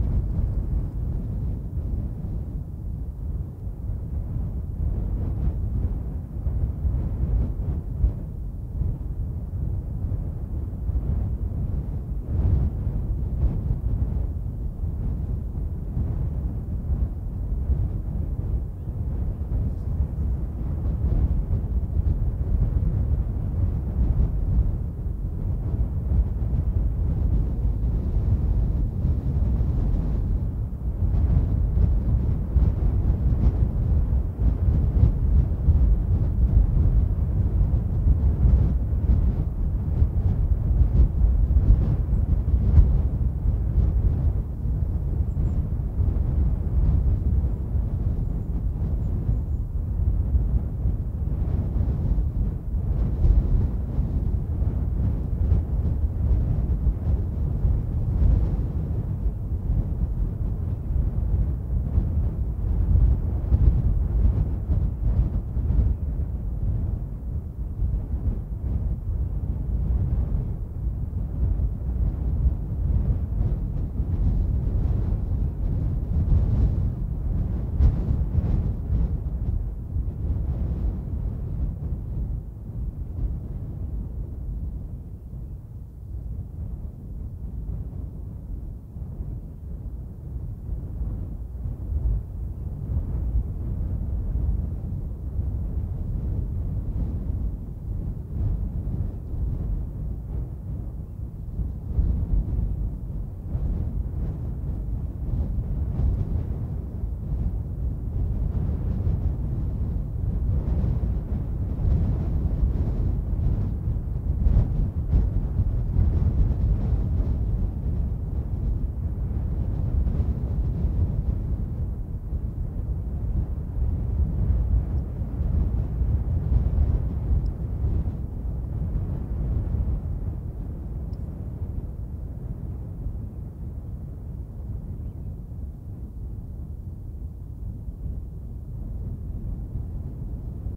Thats it! Just wind. Ok, fine, there's a bird somewhere before the first minute too. Mild to medium intensity gusts, constant and regular.
Recorded with a NTG3 on a MixPre6 and of course wind protection, but not that much.